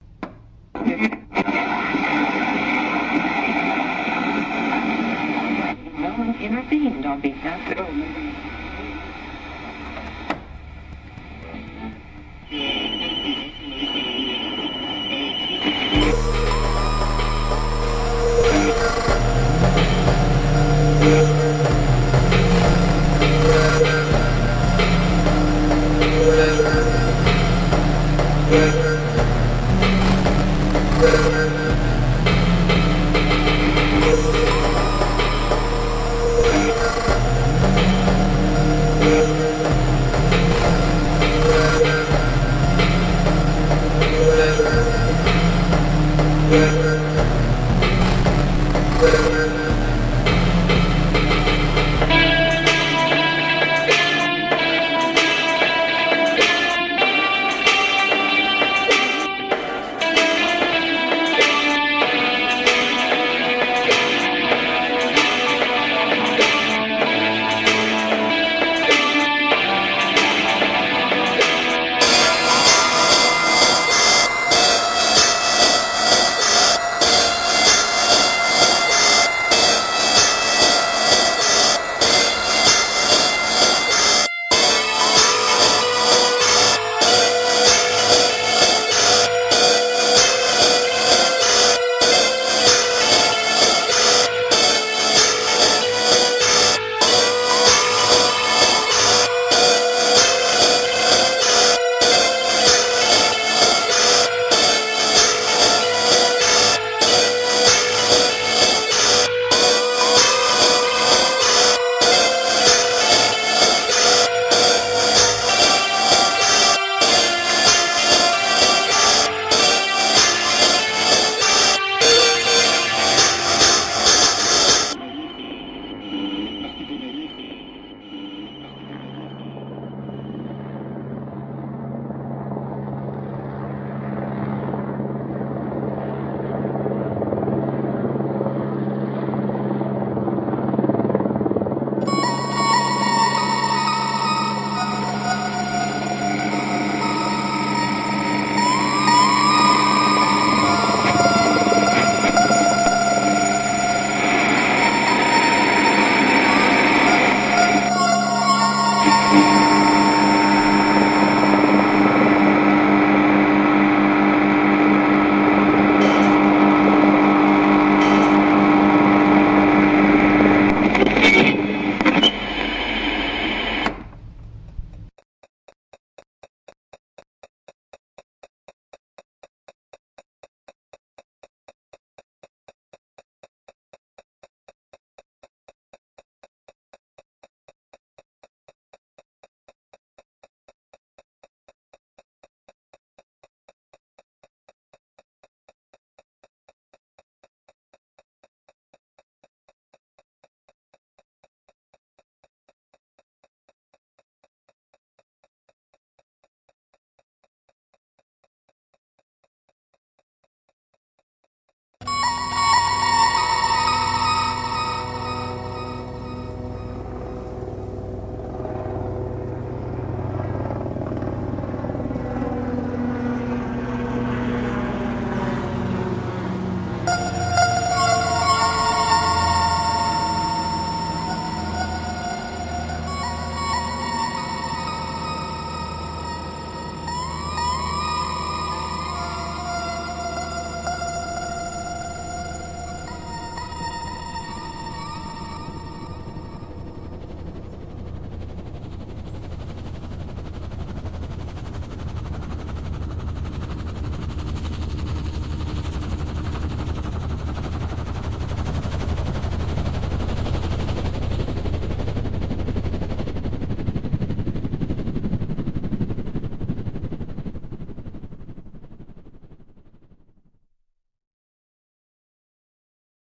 3 Dark Boys:Sacred Potato (Remix)
Original files:
EvanJones4 - 3 Dark Boys B-Sides
EvanJones4 - Sacred Potato